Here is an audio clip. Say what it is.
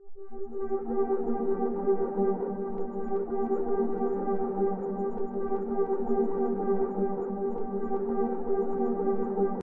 moving stereo1 (pad)
an low moving back and forth wide sound.made in ableton